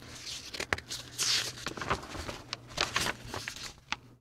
page peel 01
changing sheets
zmiana arkuszy
przekładanie kartek